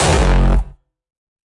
Hardstyle Kick 4 (stretched)
Bass, Hardcore, Hardstyle, Kick, Kickbass, Rawstyle